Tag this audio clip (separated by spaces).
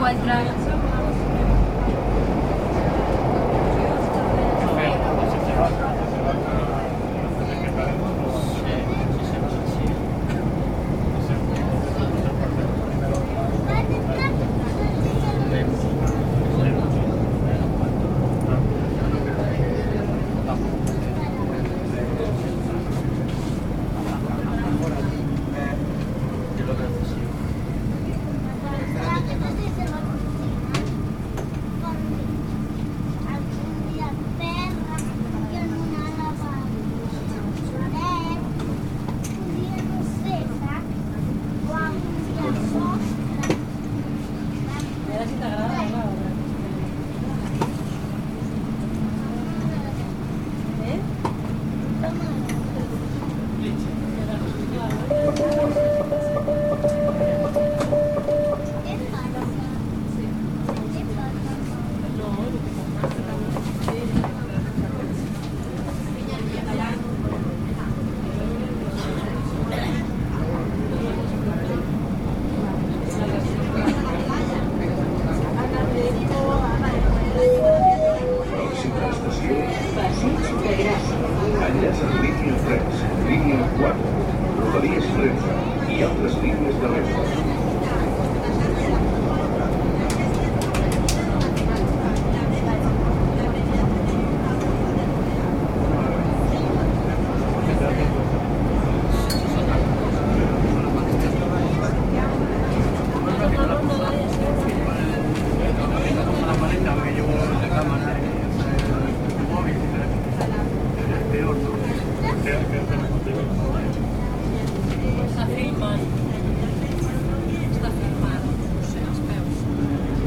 ambient metro underground